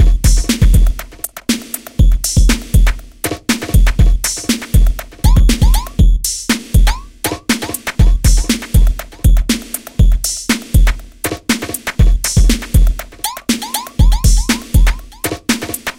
KORG EMX1 Pseudo Dub
Drumloop from popular Korg EMX1 with fx
reverb, fx, electro, drumloop, echo, dub, beat, korg, emx1, rap, hiphop, style, electribe